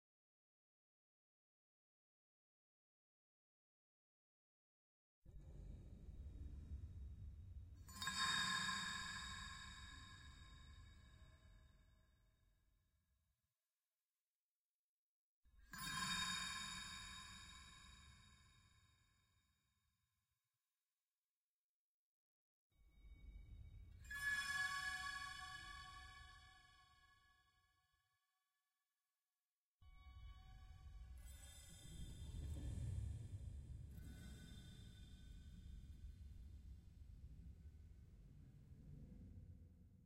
Ice, Glas and Shimmer
Strumming Mandoline on Headplate. FX Chain with very wet reverb effects and pitch
winter, freeze, reverb, ambient, frost, ice, echo, shimmering, glas, cold, shimmer